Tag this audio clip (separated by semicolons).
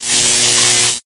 electric; sound